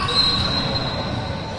Strident continued sound produced by a whistle.
basketball, sport, TheSoundMakers, UPF-CS13, whistle